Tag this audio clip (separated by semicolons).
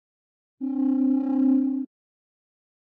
alarm; effect; future; game; science-fiction; sci-fi; signal; soundesign; space; star